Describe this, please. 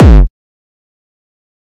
Hardcore Bass 3
Powerfull bass. Enjoy!
trance, gabber, party, bass, hardcore